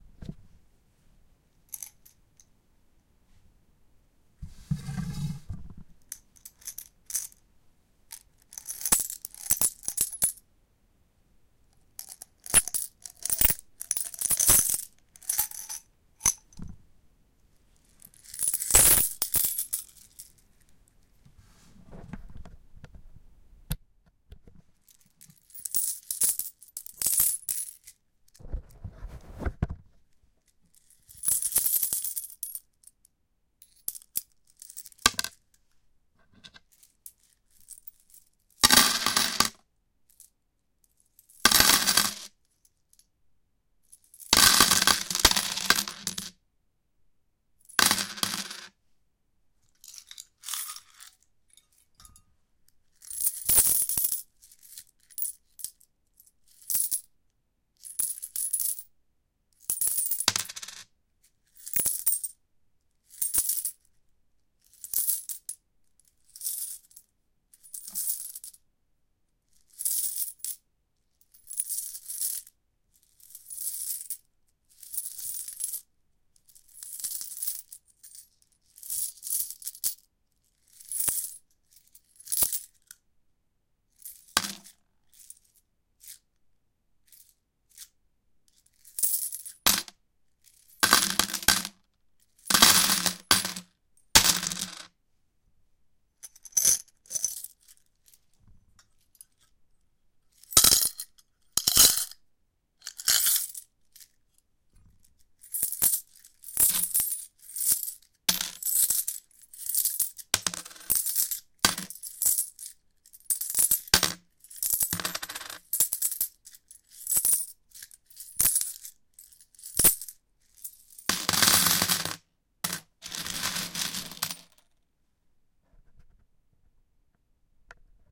I drop some coins on a metallic surface, move them, do things to them, unspeakable things.
Recorded with Zoom H2. Edited with Audacity.
bash, bashing, crash, drop, fall, falling, metallic, metals, money, nickle, pling, rolling, smash, zoom, zoom-h2